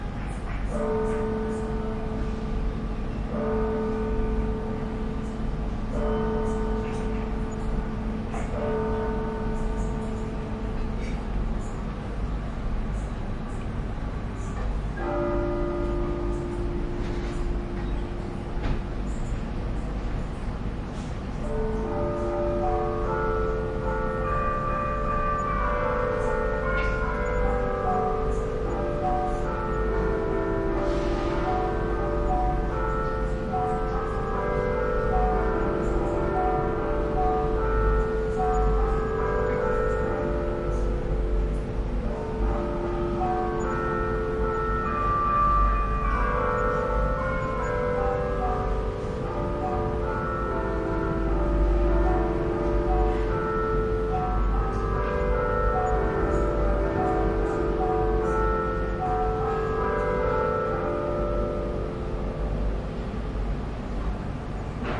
sagrada familia - 13h
Recording of the 13h bell ring of Sagrada Familia church in Barcelona. Recorded at a bedroom in the 6th floor of a building close to the cathedral at April 25th 2008, using a pair of Sennheiser ME66 microphones in a Tascam DAT recorder, using a XY figure.